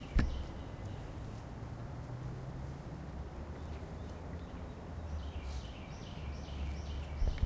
Recorded in a small wetland preserve in North East Florida, bird sounds and small waterfall in foreground, traffic in the background sorry about the beginning thud.
birds, traffic, ambiance, colvert, waterfall, Wetlands, water, field-recording, bird, Florida, nature, ambient